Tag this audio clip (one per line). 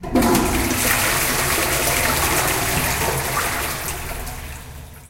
chain-bathroom
WC
UPF-CS14
campus-UPF
bathroom